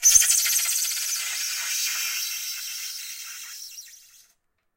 Bowed Styrofoam 3
Polystyrene foam bowed with a well-rosined violin bow. Recorded in mono with a Neumann KM 184 small-diaphragm cardioid microphone from 5-10 inches away from the point of contact between the bow and the styrofoam.